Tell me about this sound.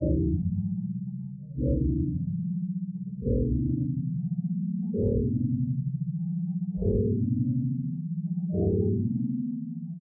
I wanted to create some synth tracks based on ancient geometry patterns. I found numerous images of ancient patterns and cropped into linear strips to try and digitally create the sound of the culture that created them. I set the range of the frequencies based on intervals of 432 hz which is apparently some mystical frequency or some other new age mumbo jumbo.
image, loop, pattern, synth